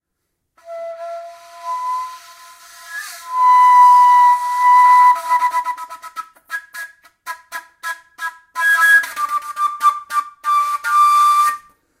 Kaval Play 03
Recording of an improvised play with Macedonian Kaval
Macedonian, Instruments, Acoustic, Kaval